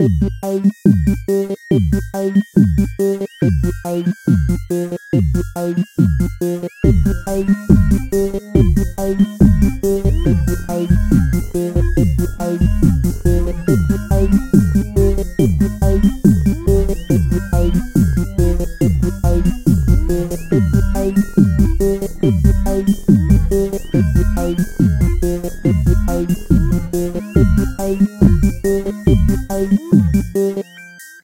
Happy Horror
hardcore, hardtek, horro